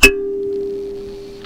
Kalimba note9
A single note from a thumb piano with a large wooden resonator.
kalimba, thumb-piano